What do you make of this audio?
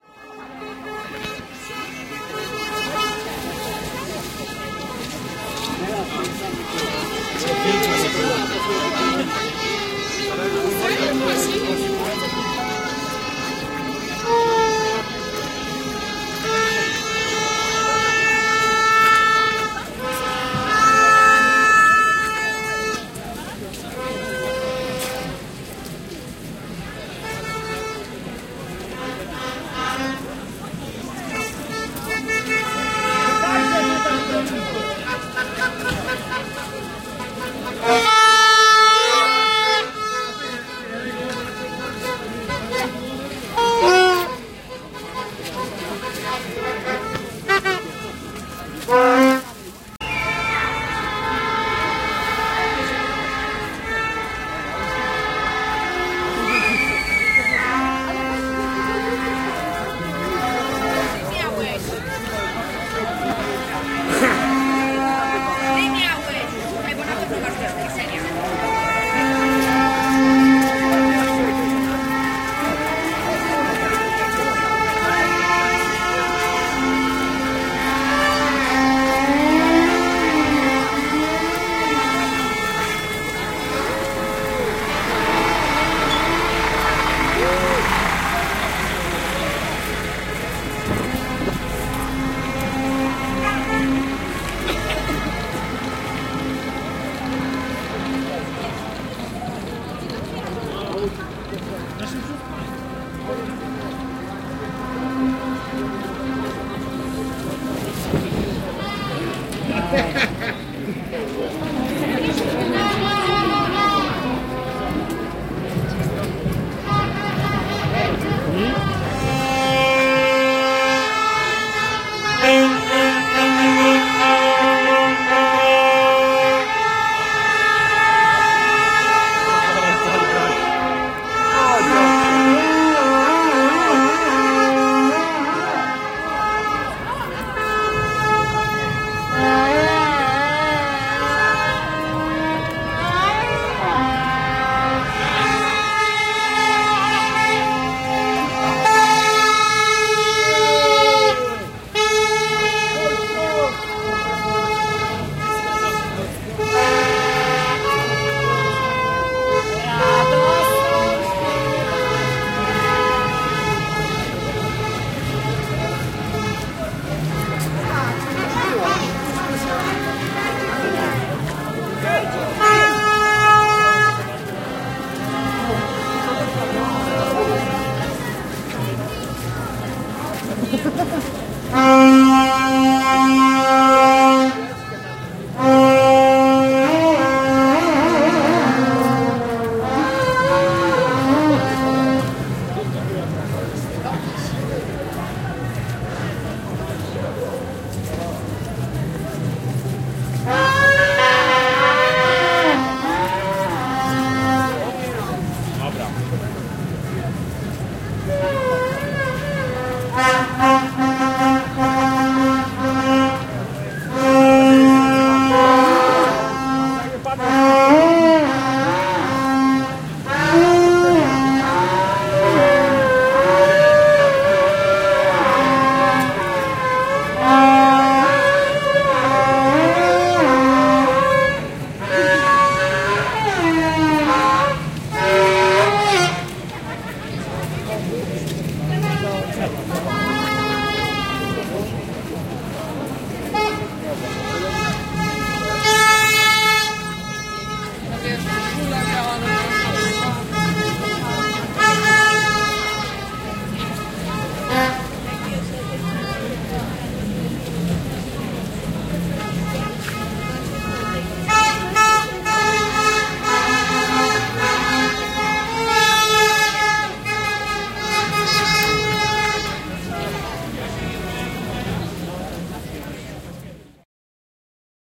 The recording of happiness at Krupówki Str. in Zakopane (Poland) after the victory of polish team in Ski Jumping Word Cup on 27th of January 2018.